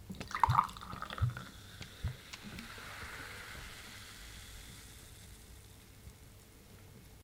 fizzy water pour 001
Sparkling water being poured into a glass, slight glugging from the bottle can be heard.
fizz, fizzy, glass, glug, glugging, pour, sparkling, water